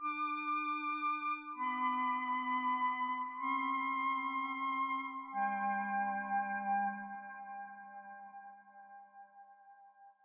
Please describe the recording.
This Sound Was Created Using An FM7 Program Keyboard. Any Info After The Number Indicates Altered Plugin Information. Hence A Sound Starts As "Create" With A Number Such As 102-Meaning It Is Sound 102. Various Plugins Such As EE, Pink, Extreme, Or Lower. Are Code Names Used To Signify The Plugin Used To Alter The Original Sound. More That One Code Name Means More Than One Plugin.
Dark, Ambient, Mood, Scifi